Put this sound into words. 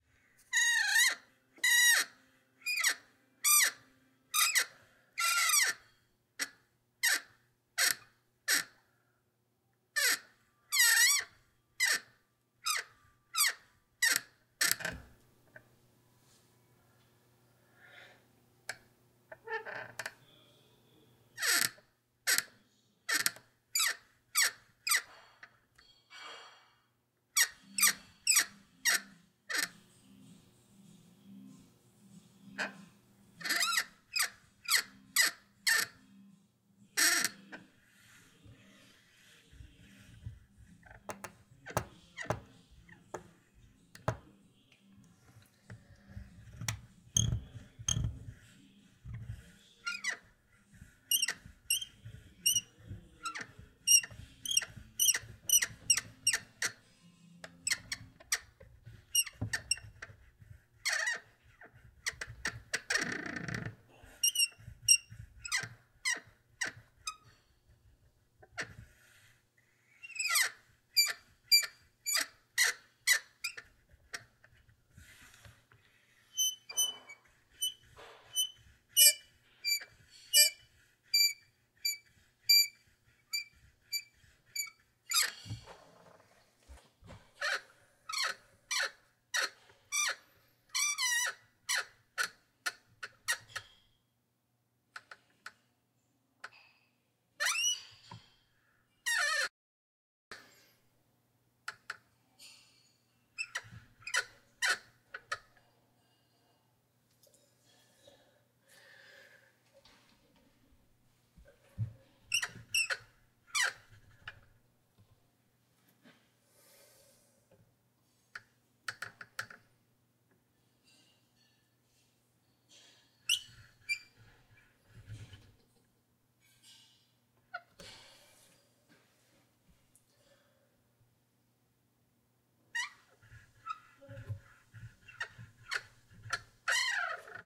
Door Sqeeks 1
This is squeaks from a wooden cabinet door.
Door, Long, Metal, Small, Squeak, Wood